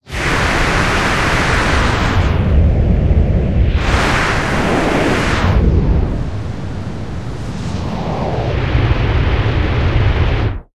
granny start
Created with Granulab from a vocal sound. Messsing with grain start postions. Reminds me of hurricane noises from inside my apartment last year... twice.
granular, noise, synthesis